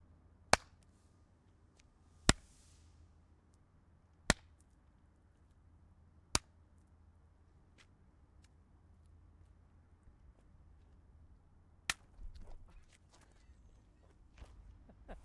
pig head hammer more
pig, head, hammer, bash, field-recording